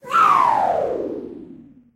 Spaceship laser 01
This sound is created from different recording recorded by Sony IC recorder and apply Delay in Audacity:
Delay type: Bouncing ball
Delay level per echo: -1 dB
Delay time: 0,050
Pitch change effect: pitch/tempo
Pitch change per echo: -1,0%
Number of echoes: 30
Games, laser, Space, Spaceship